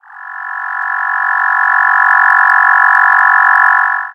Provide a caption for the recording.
Security Scanning 01
Security Scanning
Can for example be a robot patrolling or lasers that you have to avoid in order to not get detected and/or killed!